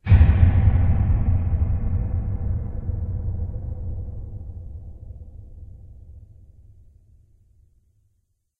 Cinematic Hit
A very cinematic dark hit. Created in Audacity from a deep piano tone I recorded using a Tascam DR-40. I then used the Paulstretch effect to get the final result